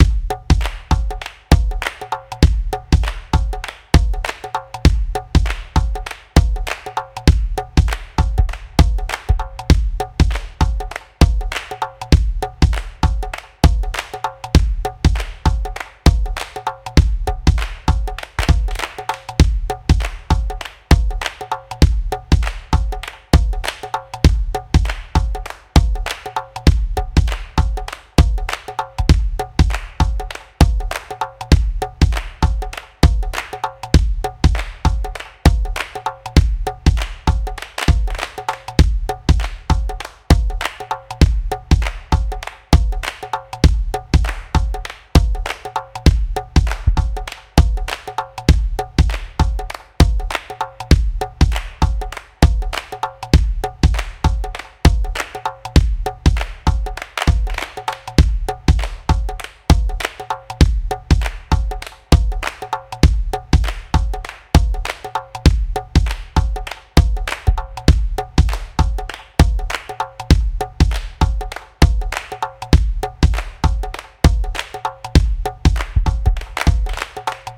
Savanna stomp groove
Based on the drum samples from GHANA drums this loop is suitable for hiphop and RnB beats. 32 bars long, almost 1:30 in length. The drums used beside the above mentioned is a clap created with the Stompbud VSTI, and a kick made with the Korg M1 VSTI.
Here is the track from which I derived this loop:
groove, culture, swing, loop, drums, ethnic, acoustic, africa, hiphop, clap, music, drum